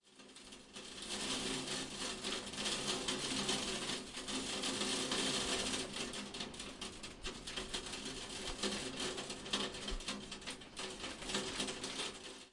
Hail Storm Window Int UK Cambridge Short
Recording of a hail storm inside a house. Lots of hail on window action.
Equipment used: Zoom, H4 Recorder, internal mics
Location: Cambridge, UK
Date: 16/07/1